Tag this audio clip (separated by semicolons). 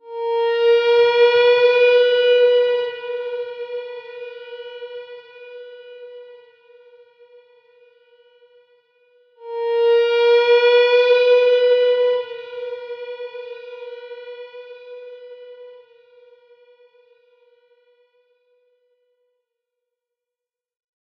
death; e; electric; good; once; sound; spiel; time; upon; vom